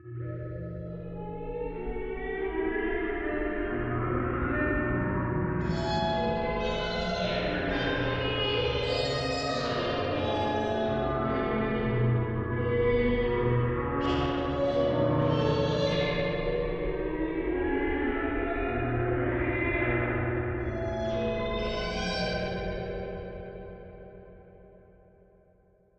cat axe
A guitar sample I made mangled into a cat like sound. Strange, a little sad. Part of my Atmospheres and Soundscapes pack designed as intros/fills/backgrounds.
ambience, animal, cat, cry, electronic, guitar, music, processed, strange, voice